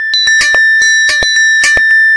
A rhythmic loop created with an ensemble from the Reaktor
User Library. This loop has a nice electro feel and the typical higher
frequency bell like content of frequency modulation. An experimental
loop, mostly high frequencies. The tempo is 110 bpm and it lasts 1 measure 4/4. Mastered within Cubase SX and Wavelab using several plugins.